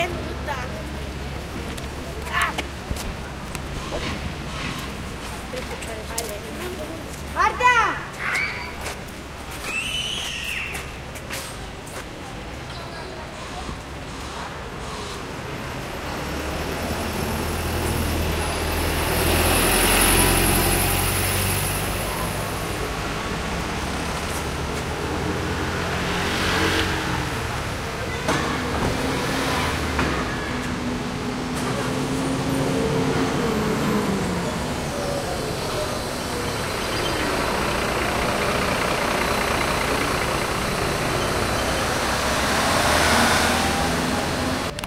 20211013 PlGerhard Traffic Nature Humans Quiet
Urban Ambience Recording at Pl. Robert Gerhard, by Centre Civic Bon Pastor, Barcelona, October 2021. Using a Zoom H-1 Recorder.